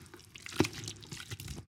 Pulling Something Out of the Mud - Foley
Pulling hand out of Five gallon bucket of mud